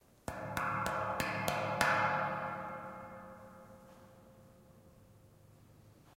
mySound GWECH DPhotographyClass stairs rails
stairs, rails, stairway, stair